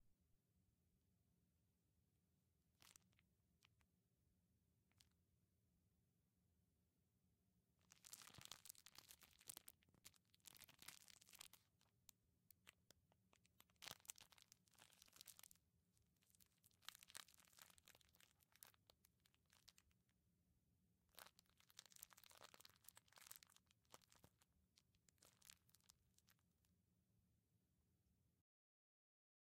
CANDY WRAPPER CRUNCHING
This record is of a candy wrapper scrunching up like faux static noises in cellphone scenes, and normal scrunching up of candy wrappers.
crackle, plastic, candy, crinkle, wrapper, crunch, scrunch, static, piece